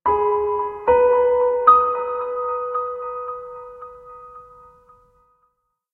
Mellow piano phrase, part of Piano moods pack.